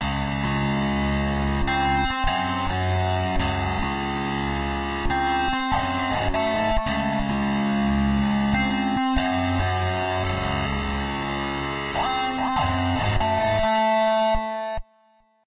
guitar riff 1
shred, old, sweet, 80s, guitar, school, palm, fast, shredder